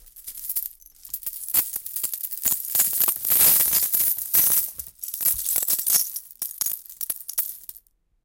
Dropping some seashells from 1 meter from the ground. Recorded indoors with a zoom H1
cash, cash-like, field-recording, foley, Hi-frec, saturated, sea-shell, seashell